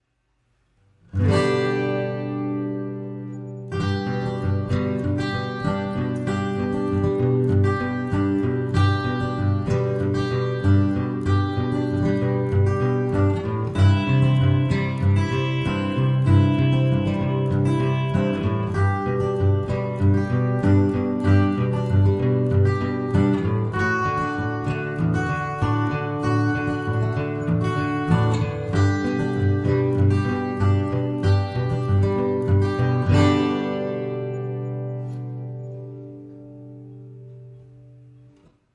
This is stereo record where I played on my acoustic guitar simple sequence with G, C, D major chords with using fingerpicking style. Record through "AKG Perception 100". This is good sweety loop for your background needs. Tempo - 96 bpm.